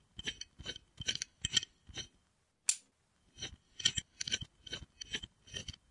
A stereo recording of a screwgate carabiner being unlocked, snapped and re-locked . This one with the screw lock more prominent. Rode NT4 > FEL battery pre-amp > Zoom H2 line in.